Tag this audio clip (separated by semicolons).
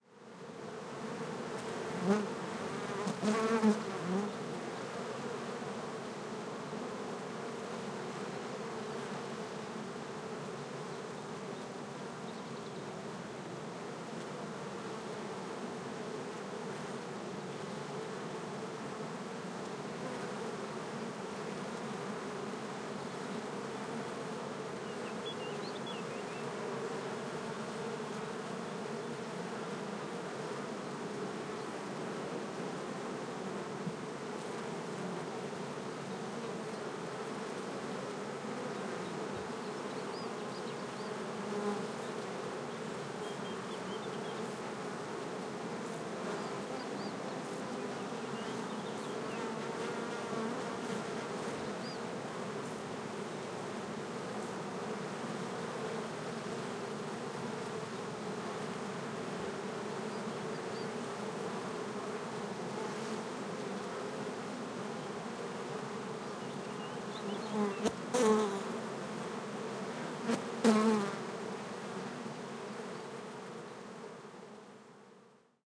beekeeping; bee-yard; britany; buzz; buzzing; insect; nature